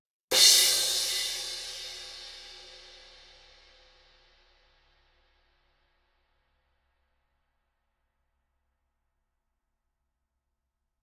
Zildjian K 17" Dark Crash Medium Thin Harder Hit